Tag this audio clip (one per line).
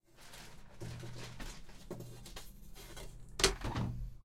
close
wood